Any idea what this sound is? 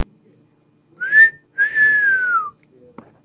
It may not be that loud, but Brianna whistled loud.
loud, really, whistle